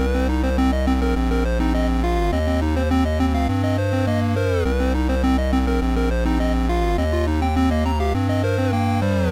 Chiptune Loop Episode 01
Random Chiptune loop i made.